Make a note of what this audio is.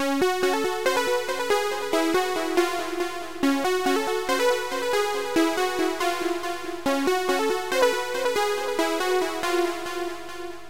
melody synth techno electronic pattern
melody, pattern, electronic, synth, techno